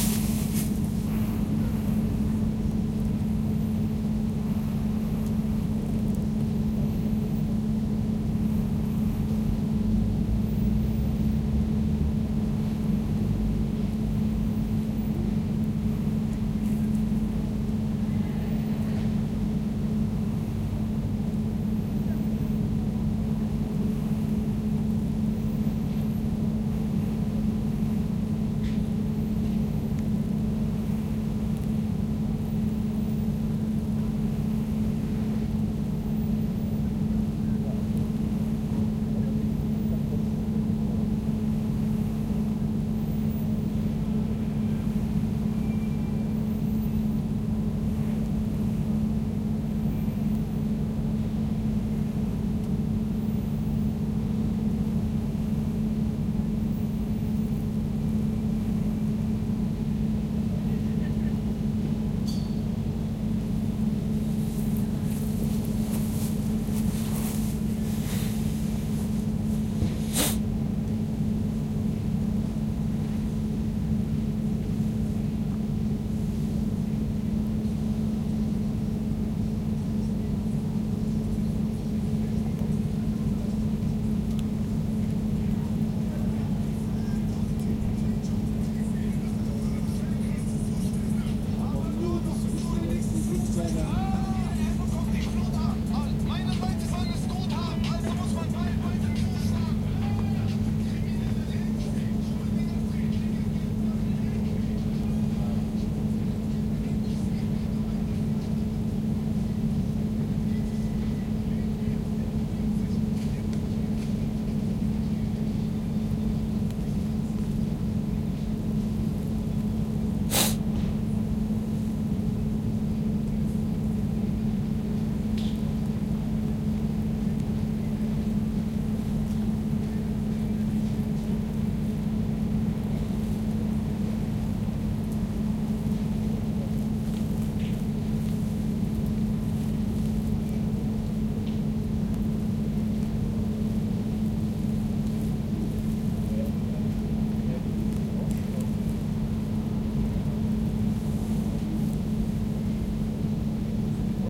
Air Conditioner on a Camping place
sound, festival